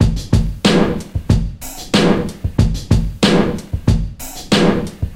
hip hop 5
beat rework with fl studio sequencer and slicex vst + a additional snare. 93 bpm.
Adobe audition for reverb
beat for sampler mpc,sp,...
downtempo, loops